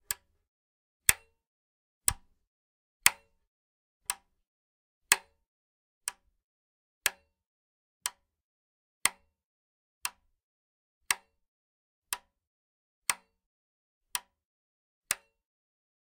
Variations of a wall heater switch being turned on and off.
click, button, heater, switch